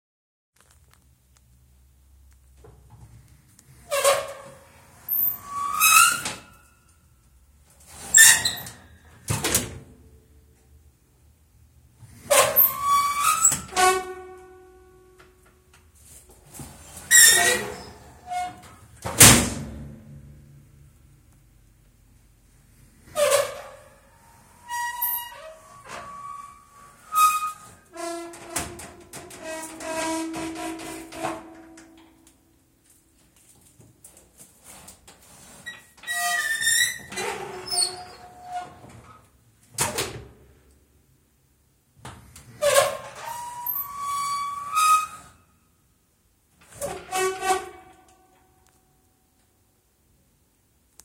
Rusty oven door
A sound of an old oven door. Useful for horror or as an industrial hatch.
This is an unprocessed original sound record.
door, echo, hatch, hinge, horror, industrial, metal, old, oven, rusty, squeak, squeaky